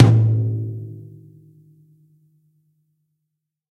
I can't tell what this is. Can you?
SRBD RTOM2 001
Drum kit tom-toms sampled and processed. Source was captured with Audio Technica ATM250 through Millennia Media HV-3D preamp and Drawmer compression. These SRBD toms are heavily squashed and mixed with samples to give more harmonic movement to the sound.
sample, kit, drum, real